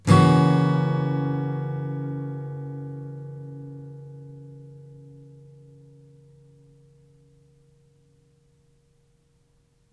chord Fsharp7
Yamaha acoustic through USB microphone to laptop. Chords strummed with a metal pick. File name indicates chord.
acoustic, strummed, guitar, chord